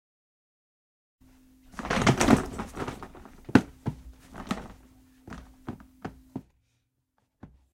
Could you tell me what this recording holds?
FX The Gilligan Stumble
My Swiss Army knife of sounds: I recorded myself falling over a plastic stool for an episode of our comedy podcast, Mission: Rejected. It was supposed to be a one-time sound, where a clumsy analyst named Stuart Gilligan trips while getting out of his chair.
I've used this sound two dozen times since, for fights, trips, tearing pictures off walls, throwing people out of windows, and plenty of others. I can't explain why this sound amuses me so much, but I hope others can find use and amusement in it as well.